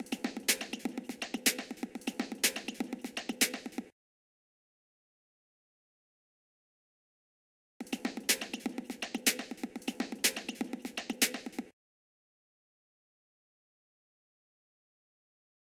High Pitch Rhythme
A cool rhythm with a lot of high end at 123 BPM.